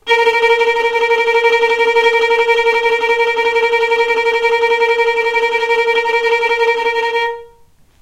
violin tremolo A#3
tremolo, violin